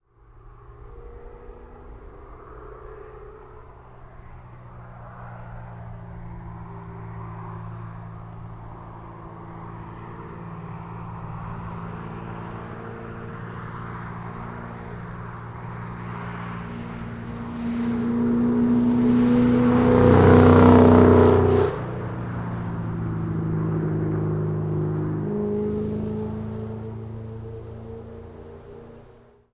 Sound of a Mustang GT500. Recorded on the Roland R4 PRO with Sennheiser MKH60.